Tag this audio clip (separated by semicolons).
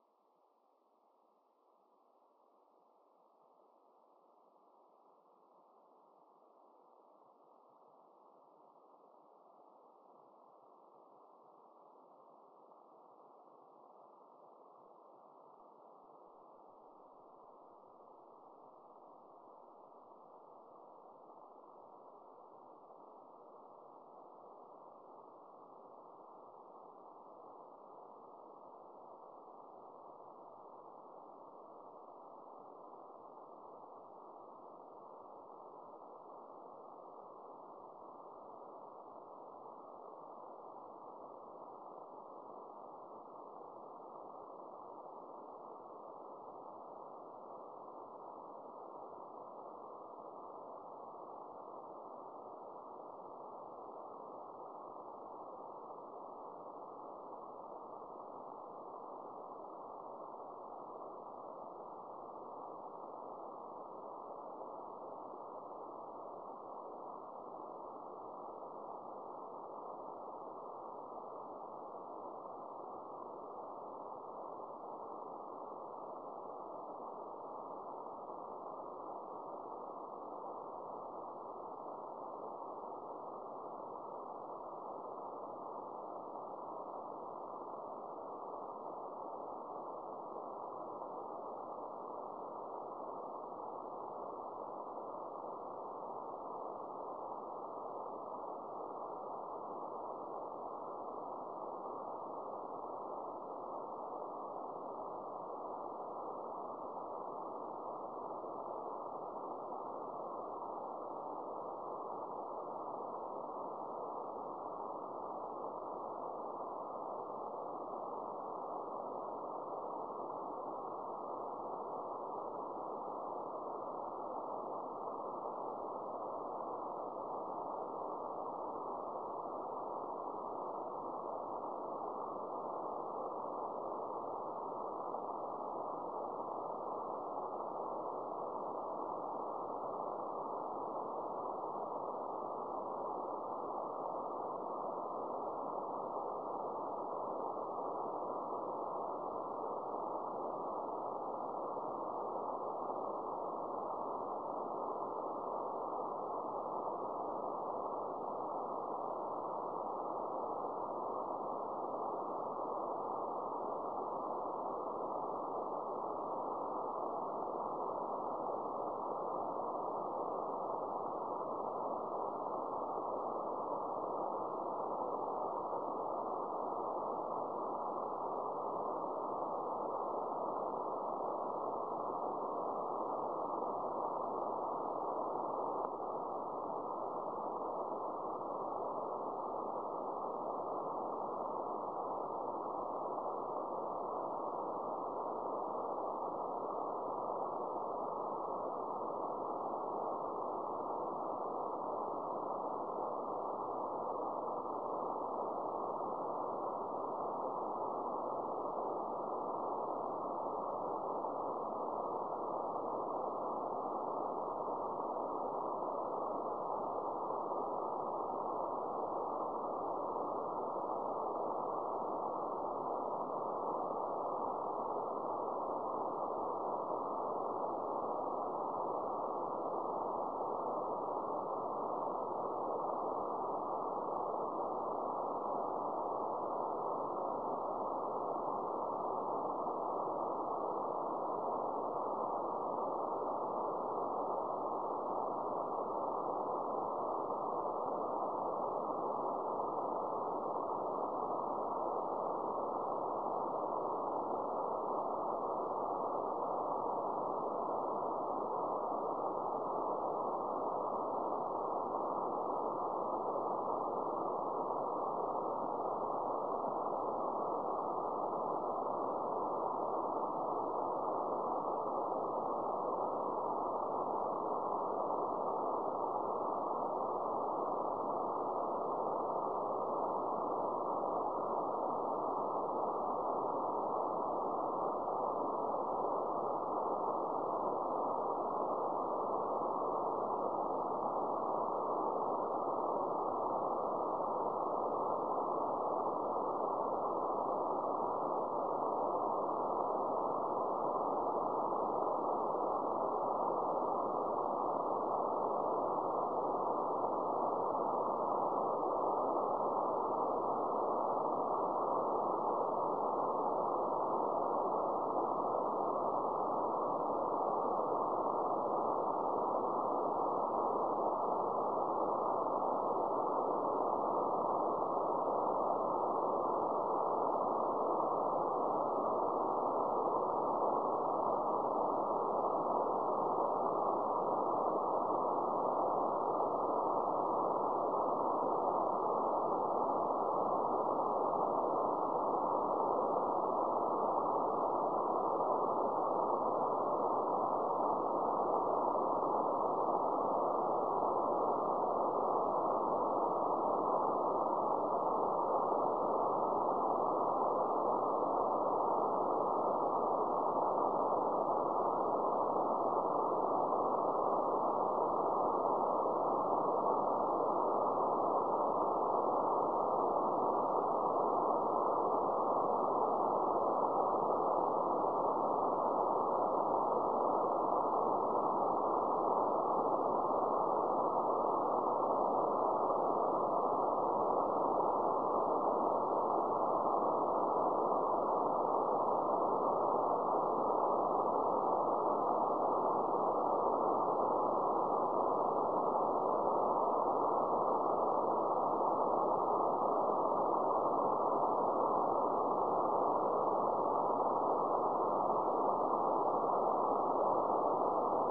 beesat; fountain; satellite